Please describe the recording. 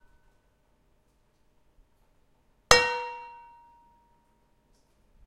pan slam
frying pan falling